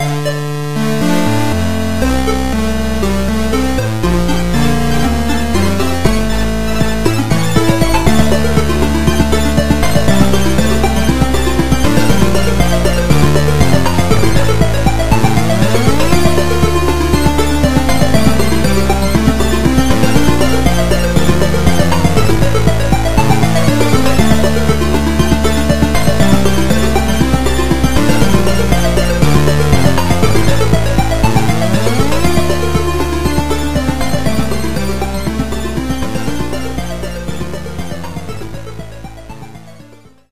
Another song made in musagi. It loops from a certain point, and I made it repeat twice and fade out. You can insert more repetition with a sound editor.
musagi, music, nes, retro, song, surpni, synth